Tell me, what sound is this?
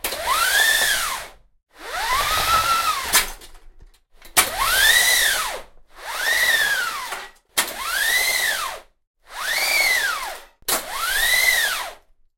Projector Screen Pull in/out - close recording for future sound design use :) Recorded with Zoom H1
Projector, screen